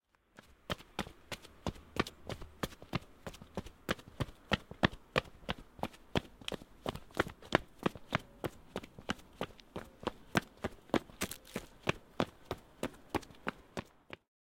02-Man fast walking concrete
Man fast walking on concrete